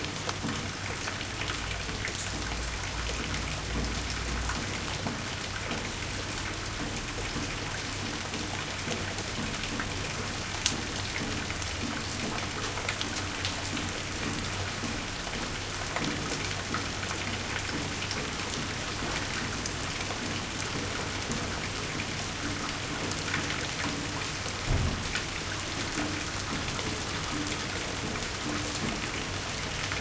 Light rain drizzle, recorded from basement window.
M-S Recorded with Neumann RSM191 (decoded while recording)
Israel
from, LIght, rain